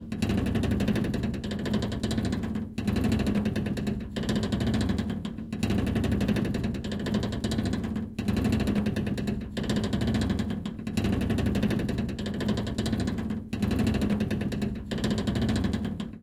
Metal Ripple - machine like
Rippling sound from a metal vent that has rhythm of gear movement
ripple, gear, machine, industrial, sweetner, tools